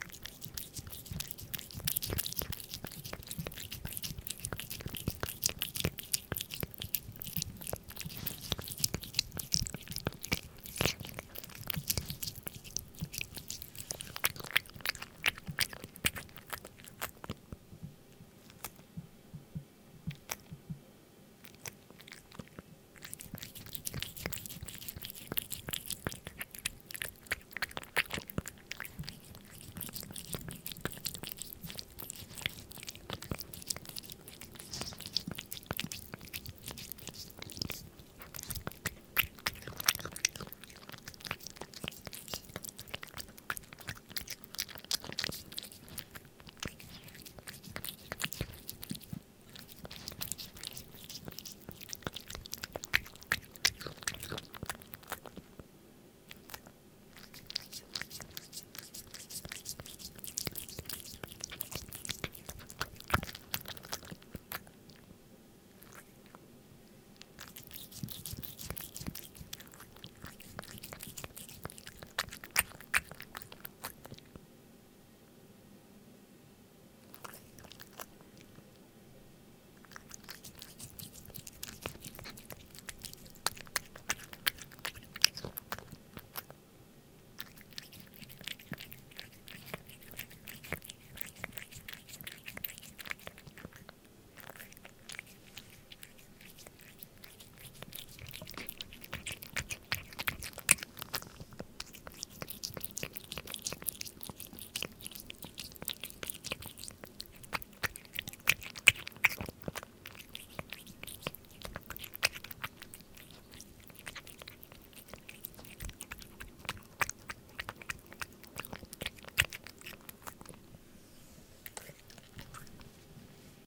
domesticcat eats wet food
Eating cat recorded with a shot gun microphone, extreme close-up. Cat eats wet food from a small plate. A pretty clean recording with constant low level background hum.
domestic, close-up, eating, wet, cat, animal, food